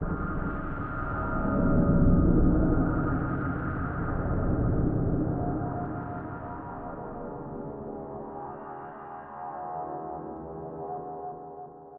ab airlock atmos
suspense airlock deep space